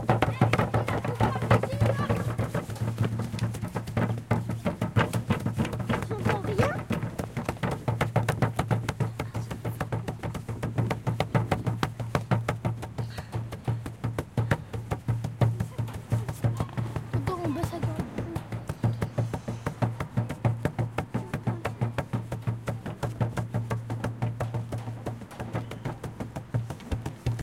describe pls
Sonicsnaps-OM-FR-lebanc
Banging on a wooden bench.